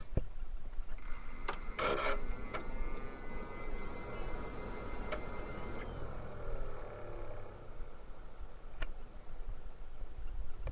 Xbox360OnOff
The sound of an XBox 360 turning on and off.